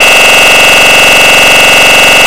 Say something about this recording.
a loud electronic machine running
buzz, electronic, loop, loud, machine